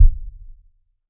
Thud 2 HighShortReverb
See description of Thud_2_Dry -- this is it with a short reverb mixed in at relatively high volume.
A thud is an impulsive but very short low frequency sweep downward, so short that you cannot discern the sweep itself. I have several thuds in this pack, each sounding rather different and having a different duration and other characteristics. They come in a mono dry variation (very short), and in a variation with stereo reverb added. Each is completely synthetic for purity, created in Cool Edit Pro. These can be useful for sound sweetening in film, etc., or as the basis for a new kick-drum sample (no beater-noise).